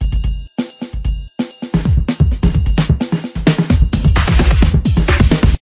hip hop 7
sample sound loop